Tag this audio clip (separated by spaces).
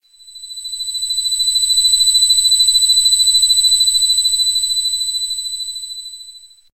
terrifying thrill suspense tinnitus ears horror-fx horror-effects terror